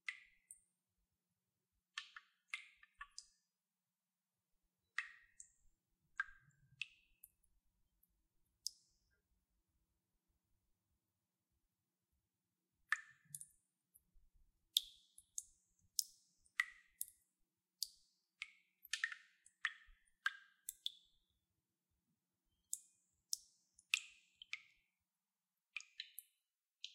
DROPS WATER: This is the sound of a dripping faucet over a glass of water, I made several recordings to find the drip rate sought by regulating the intensity.
I used ZOOM H4 HANDY RECORDER with built- in microphones.
I modified the original sound and added equalized and compression.
fallen, Water, Drops